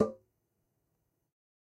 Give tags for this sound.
closed record god home trash conga real

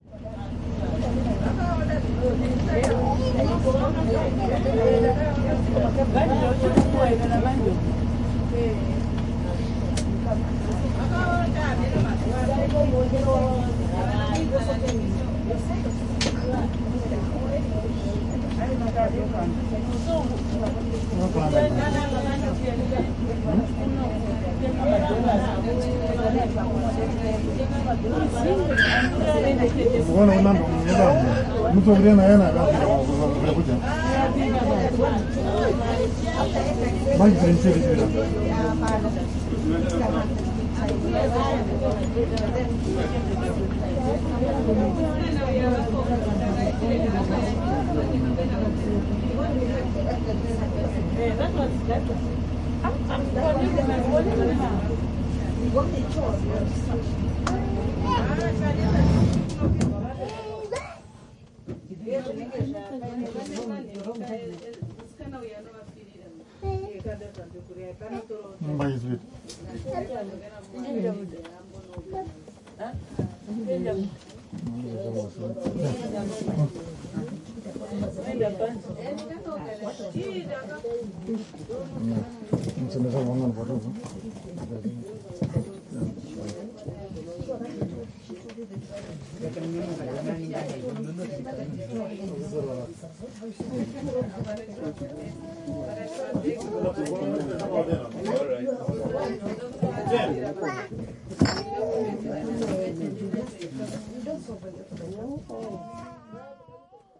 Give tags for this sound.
Africa Afrikka Field-Rrecording Finnish-Broadcasting-Company Ihmiset Puhe Soundfx Talk Tehosteet Yle Yleisradio

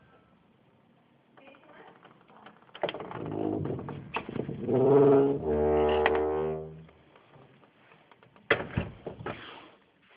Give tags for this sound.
door opening squeak

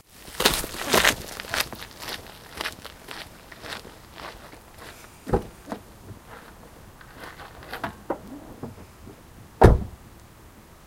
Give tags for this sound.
Car close door gravel open slam walk

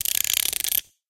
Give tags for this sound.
winding-up windup

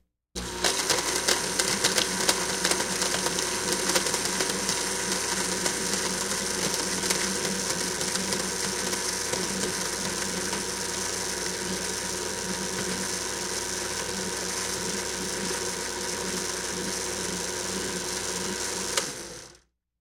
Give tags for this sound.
oster blender 80s

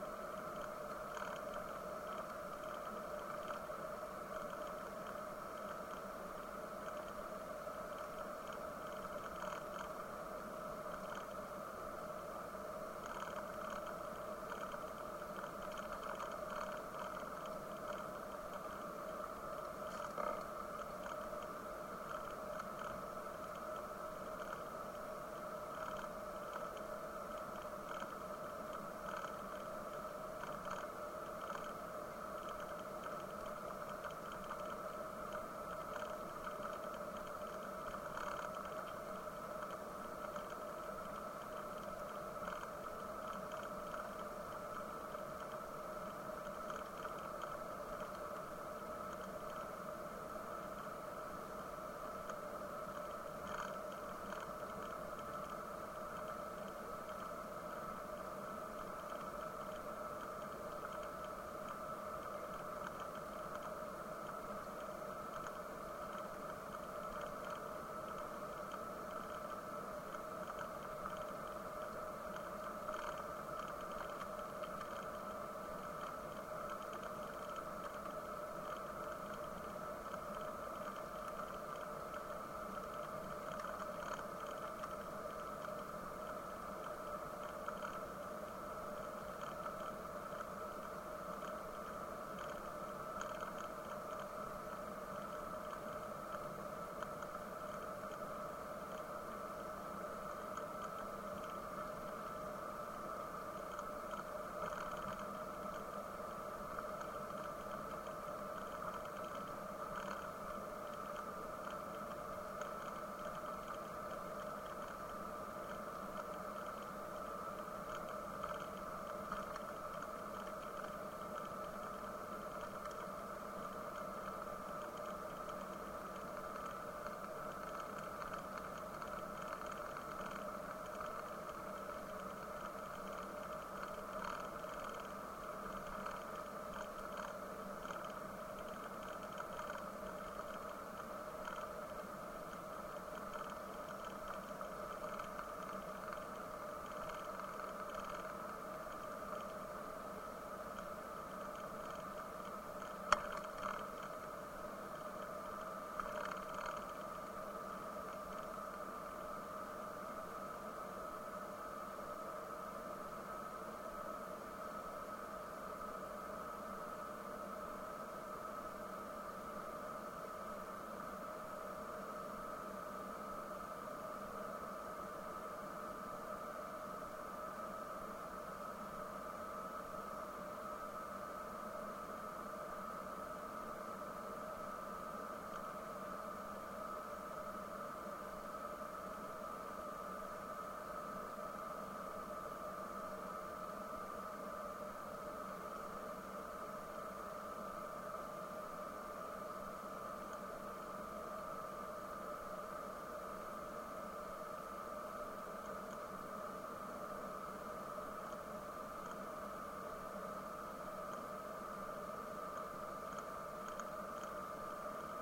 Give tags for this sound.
air-berlin aircraft airplane aviation cabin contact flight jet linate mic plane taxiing tegel window

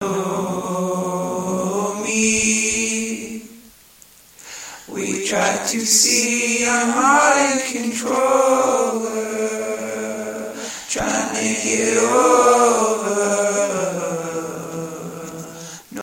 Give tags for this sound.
Indie-folk acapella drum-beat drums free guitar harmony indie loop looping melody original-music percussion rock sounds vocal-loops voice whistle

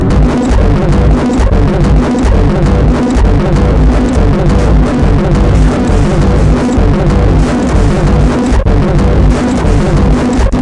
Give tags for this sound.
sound audio sample field recording

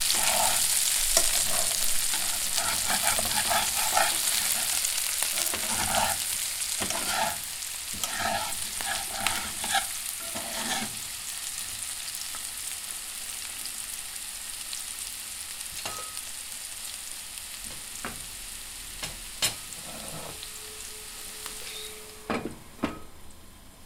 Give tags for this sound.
fizz lid food cook boiling-oil mixing oil sizzling potatoes pan fry hum

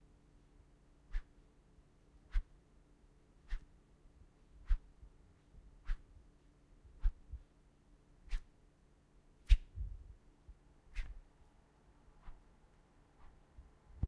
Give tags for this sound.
Whoosh slice swipe

